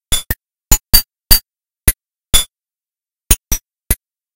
Baby Blanket 0bject count4
An Industrial HIT LOOP thingy! The samples in this are the other Industrial HITS.